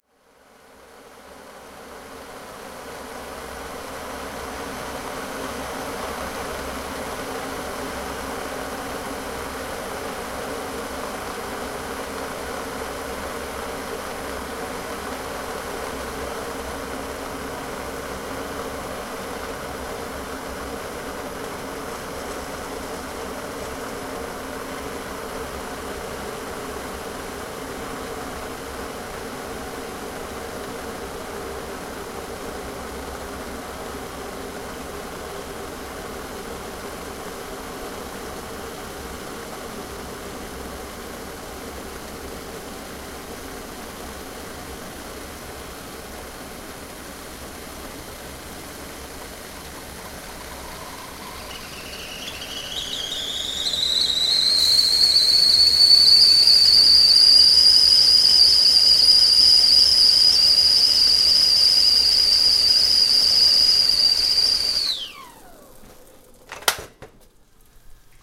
04 teapot boils - close 01:14:2008
Boiling some water for tea. Recorded with built-ins on a Sony D-50, close.